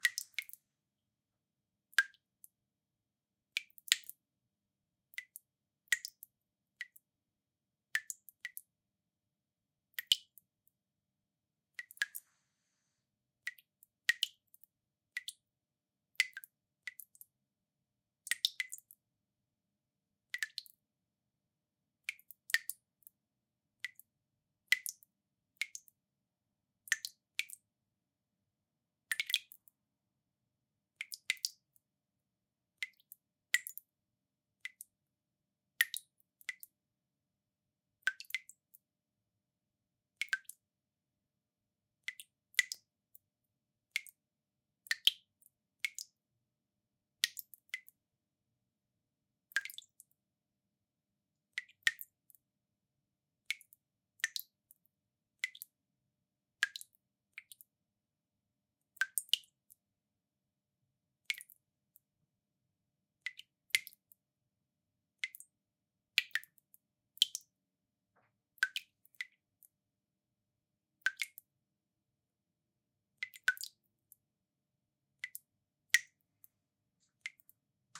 A tap dripping slowly into a full sink. Some noise reduction has been applied.